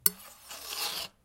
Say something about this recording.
Blade-3-Perc
Recorded knifes blades sound.